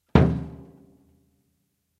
samples in this pack are "percussion"-hits i recorded in a free session, recorded with the built-in mic of the powerbook
bass-drum, bassdrum, drums, unprocessed